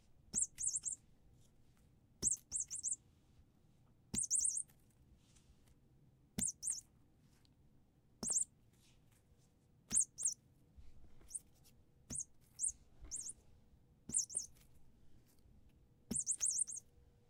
Cat Toy
Squeaking cat mouse toy dropped on a carpeted floor.
cat, toy, squeak, mouse